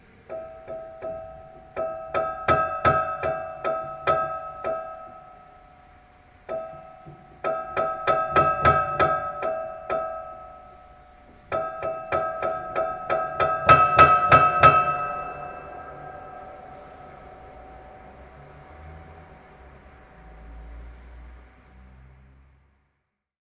Detuned Piano Stabs 4 Soft
series of broken piano recordings
made with zoom h4n
creepy, detuned, eerie, eery, filmic, haunted, horror, out-of-tune, piano, scary, spooky, suspense, thriller, thrilling, untuned, upright-piano